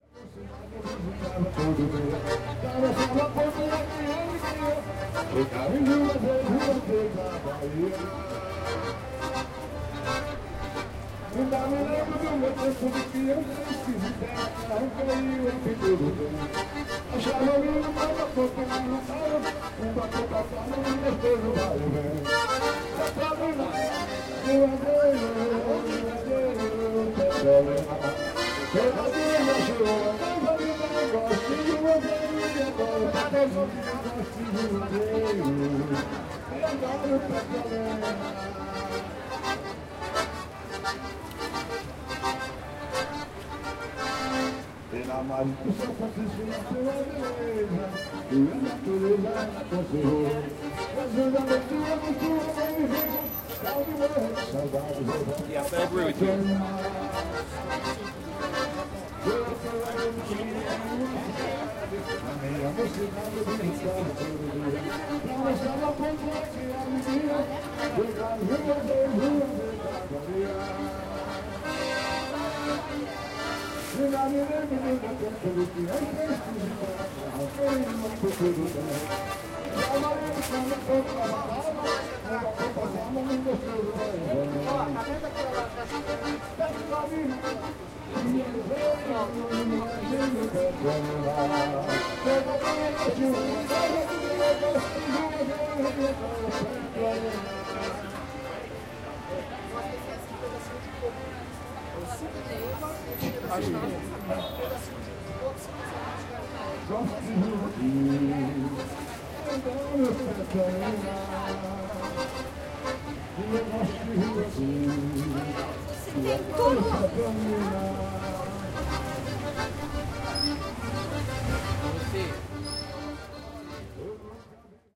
Sao Paulo Liberdade Forro 2014 10 12
Accordion player on a sidewalk of the Japanese quarter Liberdade, Sao Paulo, Brazil. He plays and sings a popular tune of the interior region of north-eastern Brazil, the so-called sertão, using a sound system with a strong echo effect. In the background one can hear the traffic sound of a big city, pedestrians walking by and talking.
xaxado, traffic, streetmusic, brasil, Sao-Paulo, forro, arrasta-pe, sanfona, nordeste, brazil, liberdade, city, people, town, street, accordion, field-recording, baiao, sidewalk, xote